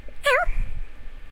I MUST state i do not agree with/participate in/or condone actual animal harm, the mod is dark humour and the samples reflect that, i hope the samples may be of use to others (i have no idea in what context they would be but hay who knows!)
this one is a bunny saying oww
oww, bunny, voice